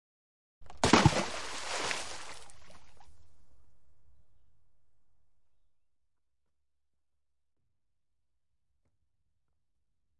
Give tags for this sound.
Bucket
Fall
Hit
water

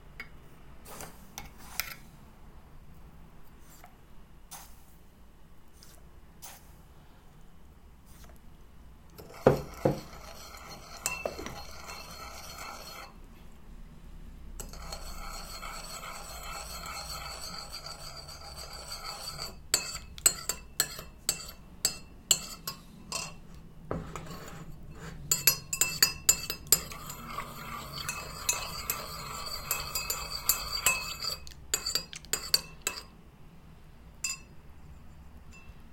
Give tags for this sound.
stir
household
kitchen
tea
shugar

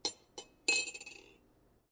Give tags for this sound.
spoon
cup
coffee

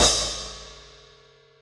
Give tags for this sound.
Broken
Crash
Cymbal

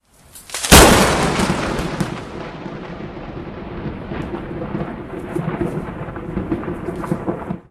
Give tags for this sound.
Close
Florida
Lightning
Loud
No
Rain
Storm
Strike
Thunder
Thunderstorm
Very
Weather

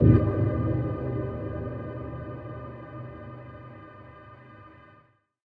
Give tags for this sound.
effect
fx
Menu
music
percussion
sound
stab
synth
UI

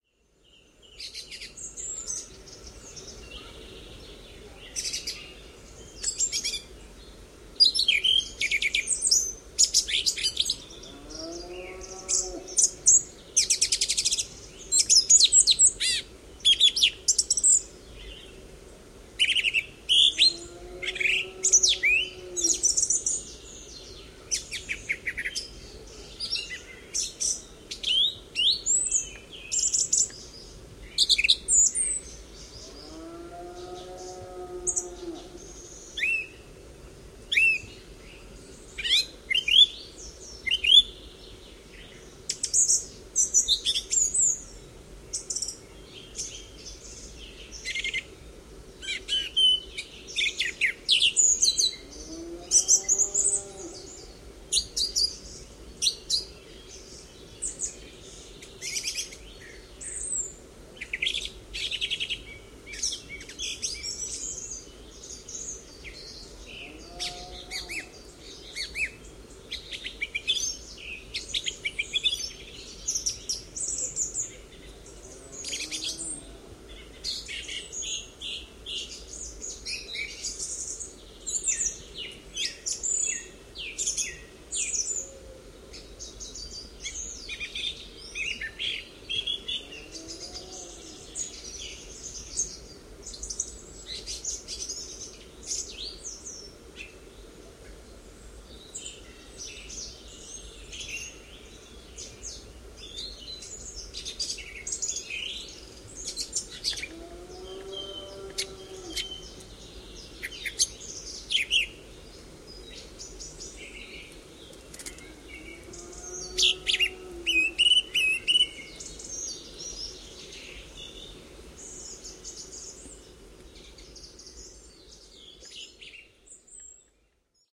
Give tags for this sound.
blackbird
cow
echo
forest
moowing
nightfall
spring
valley

